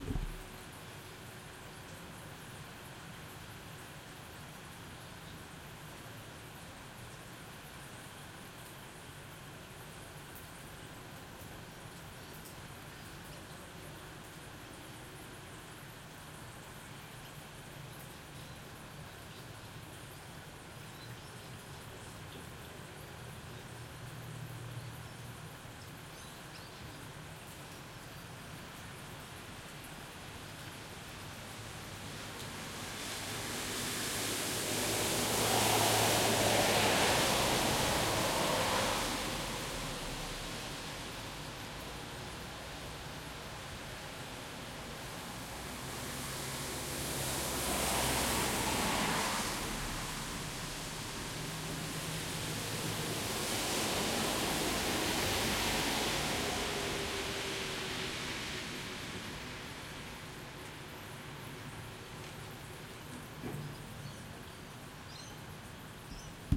cars driving on wet suburban street with ambience.